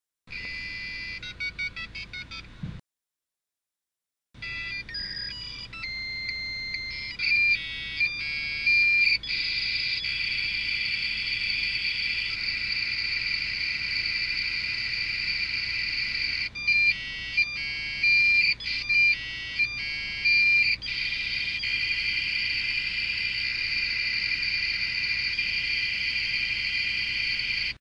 A recording of a computer connecting to the internet with a dial-up connection. I only edited it a little bit, just condensing it and removing background noise.